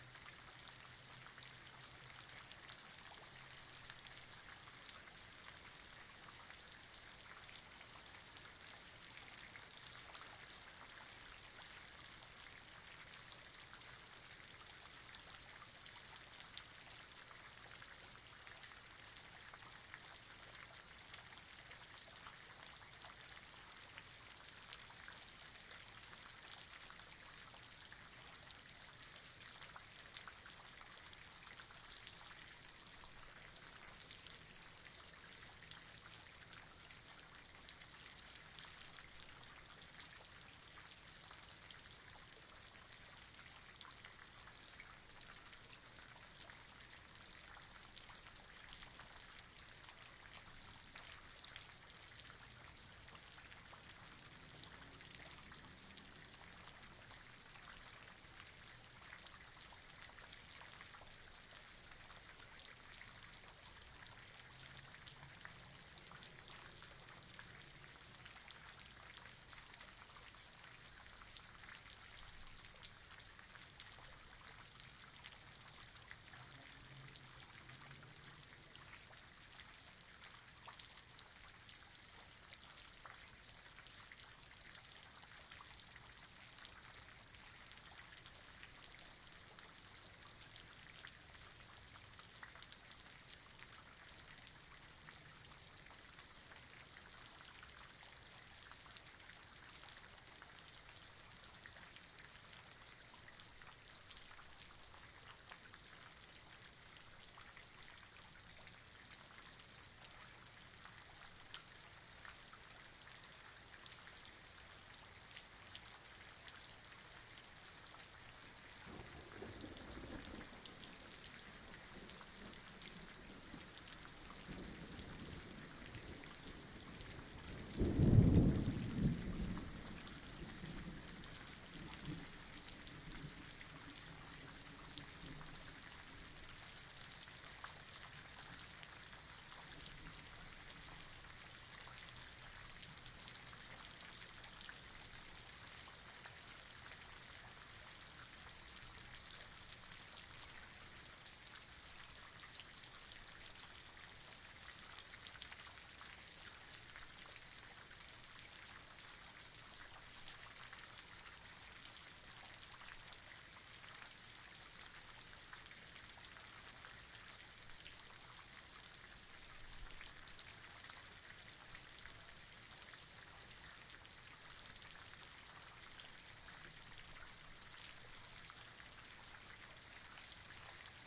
Thunder Storm And Rain (Outside Apt)
Recording a storm going on outside my apartment. Phone was sat in the window while recording with a screen in the way.
Weather, Rain, Lightning, Ambience, Thunder, Thunderstorm, Storm